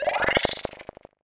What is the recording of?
Troy's magiK
Video game sound by Troy Hanson
retro, video-game, lo-fi